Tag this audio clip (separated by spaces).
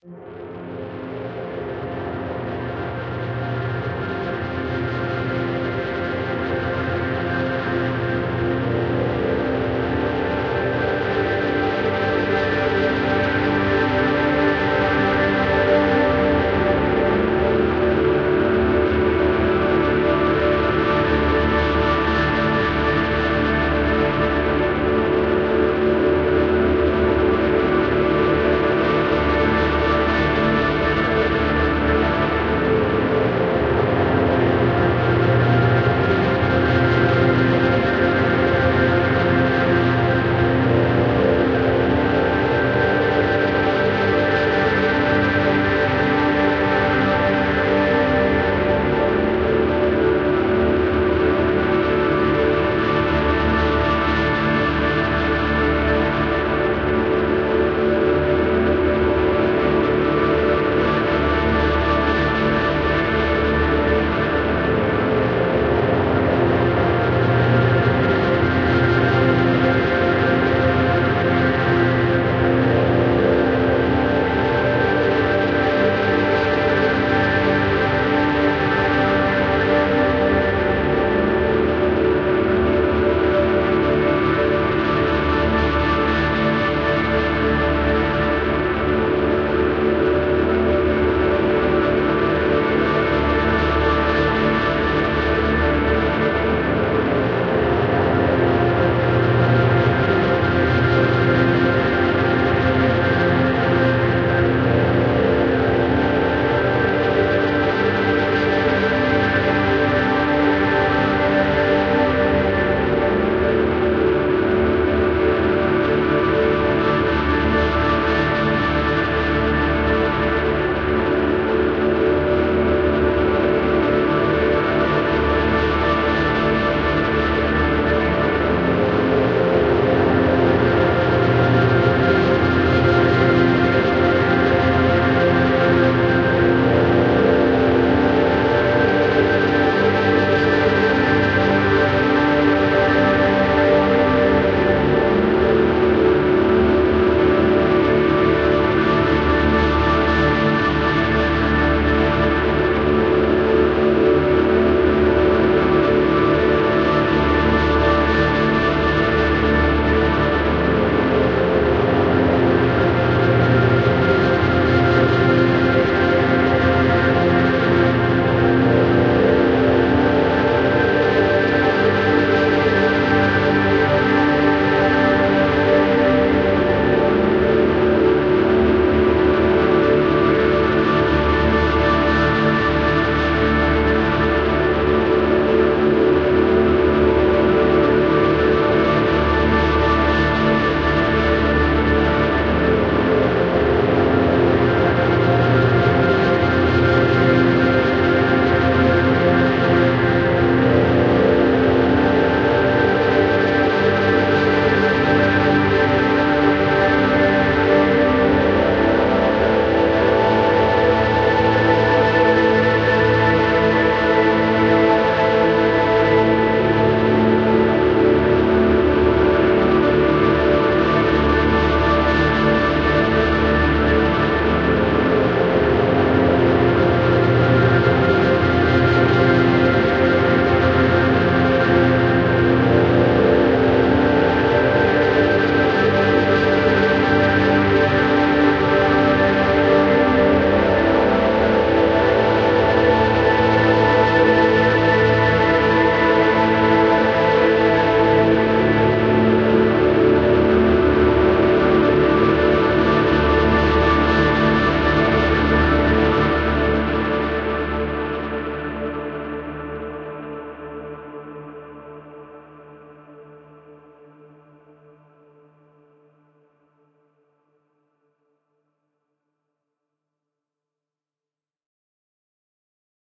ambience dark pad drone atmosphere sfx epic fx space soundscape melancholic science-fiction ambient deep cosmos sci-fi